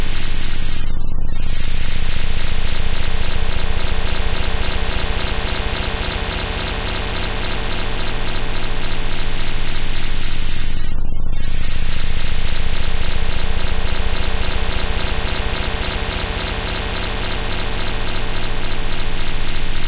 On spectroscope the sample displays animation of rotating alarm-clock. Sample done by programming position of samples. Programming done in AMOS Amiga Basic on Unix Amiga Emulator UAE.
alarm-clock, animation, bell, clock, spectroscope, time